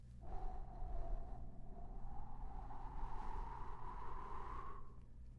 A gust of wind made with human voice